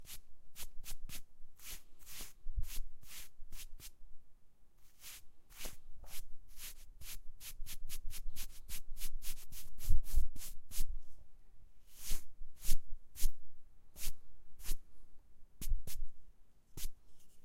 Cleaning a coat with a brush
Cleaning my coat with a brush.
Recorded with a Tascam DR-100 (build-in mics)
coat, brush, Cloth, cleaning